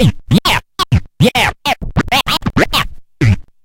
Scratching a vocal phrase. Makes a rhythmic funky groove (loopable via looppoints). Technics SL1210 MkII. Recorded with M-Audio MicroTrack2496.
you can support me by sending me some money: